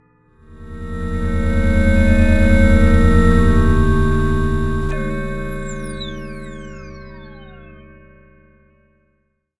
PPG 007 Insane Melody G#1
This sample is part of the "PPG
MULTISAMPLE 007 Insane Melody" sample pack. The sound is a weird melody
with some high frequencies at the end. Slow attack and release. In the
sample pack there are 16 samples evenly spread across 5 octaves (C1
till C6). The note in the sample name (C, E or G#) does not indicate
the pitch of the sound but the key on my keyboard. The sound was
created on the PPG VSTi. After that normalising and fades where applied within Cubase SX.
experimental, multisample, melody, ppg